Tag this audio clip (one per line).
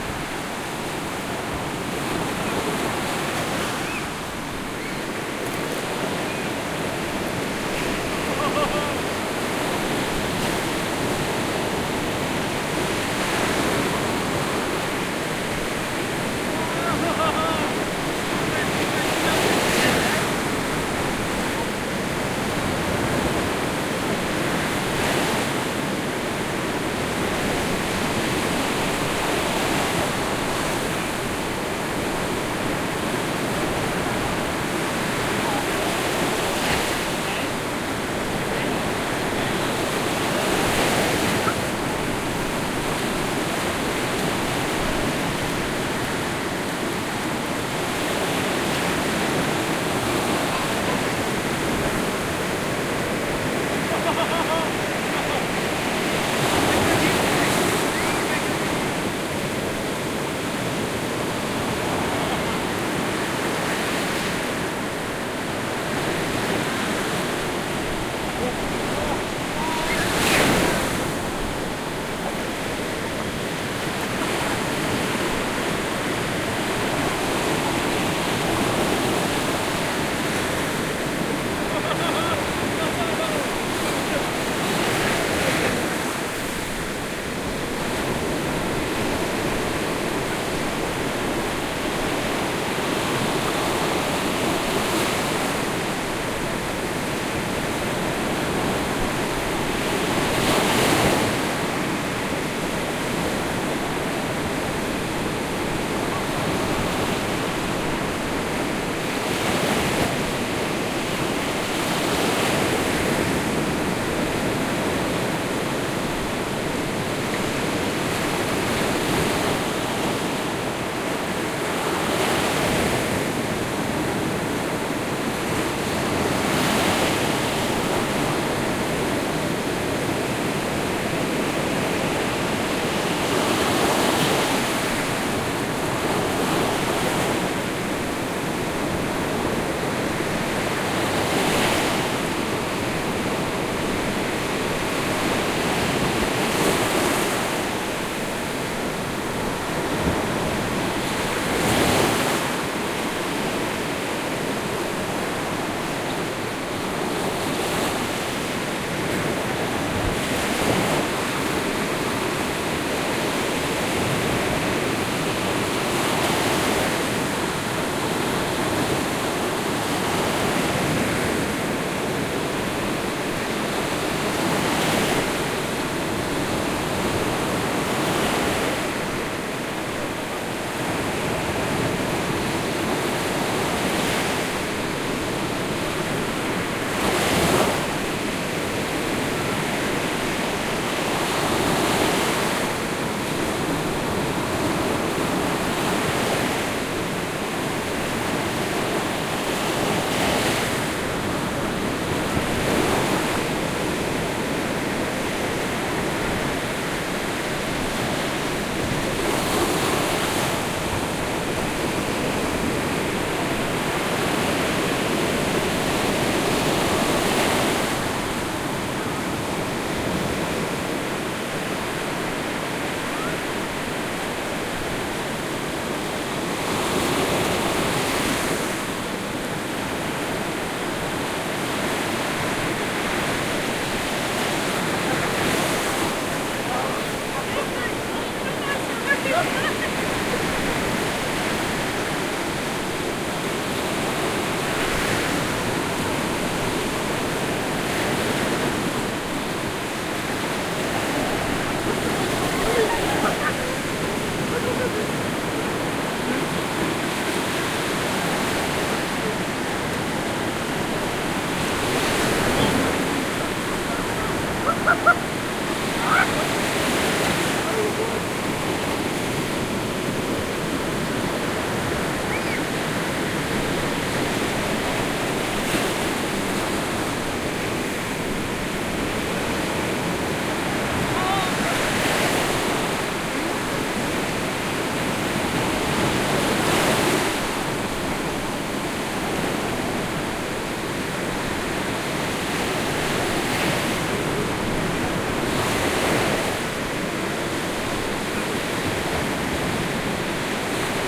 nature
children-playing
field-recording
beach
sea
Cornwall
waves
England
soundscape
atmosphere
ambience